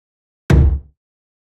Kick effect,is perfect for drum machine,cinematic uses,video games.Effects recorded from the field.
Recording gear-Zoom h6 and Microphone - RØDE NTG5
REAPER DAW - audio processing
bash, bass, battle, beat, boom, cinematic, deep, drum, effect, epic, game, hand, hit, impact, karate, kick, low, punch, quarrel, shot, strike, stroke